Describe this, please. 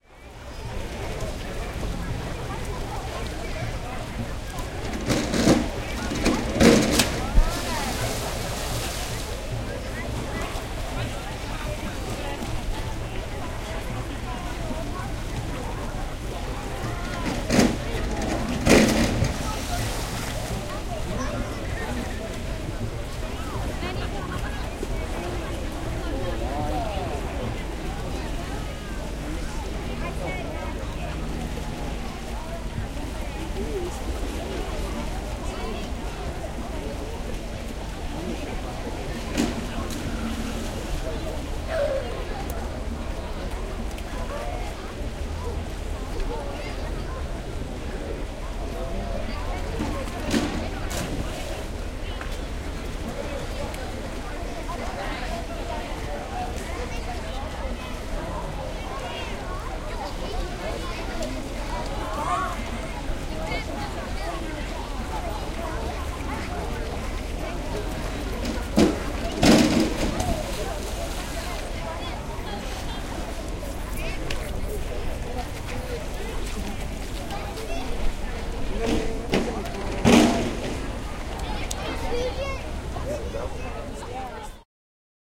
Barton Springs Long
LONG GENERAL AMBIANCE RECORDING. Recordings made at Barton Springs,a large naturally occurring swimming hole in Austin Texas. Stereo recording made with 2 omni lav mics (radio Shack) into a minidisc. transfered via tascam dm24 to computer for editing.
pool, city, field-recording, human, atmosphere, swimming, water, ambience